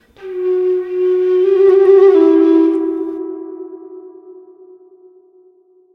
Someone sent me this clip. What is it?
flute trill
Daw: Adobe Audition, Mic: Behringer ultravoice, interface: m-audio fast track
Recorded with my Yamaha flute.